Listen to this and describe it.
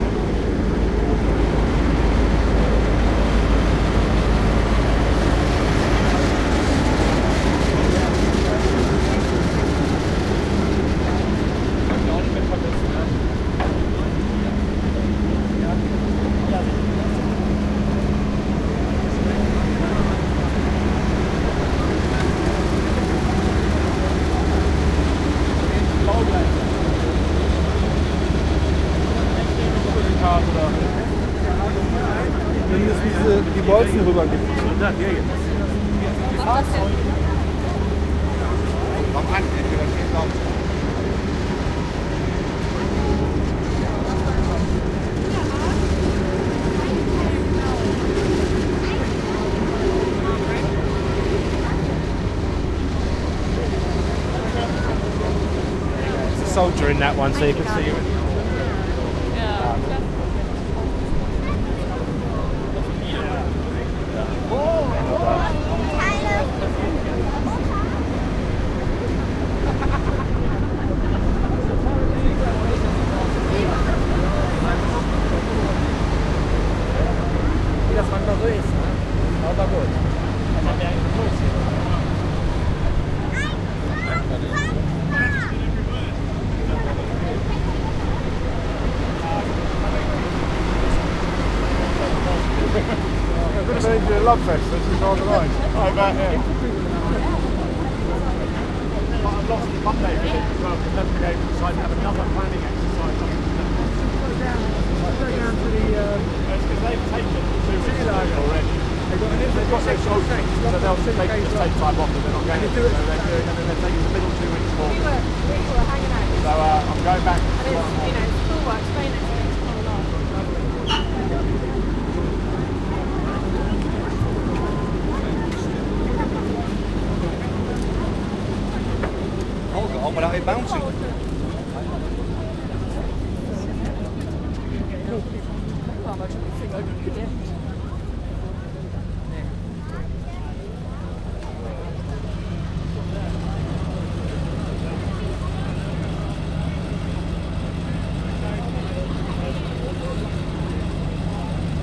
STE-012-crossing pontoon bridge minden
i am walking over a temporary military bridge raised by UK/F/DE troops spanned the river weser at minden, lowersaxony. thousands of visitors come to see the historic martial scenery and event. i am trying to escape in the opposite direction. the bridge is held by several landing vessels equipped with allied troopers. recorded with 90° focused zoom H2 with deatcat. nice collage of voices, machine etc.
250-years, minden, field-recording, minden-battle, bridge, martial, people, voices, pontoon, event, parade, military, vessel, militarism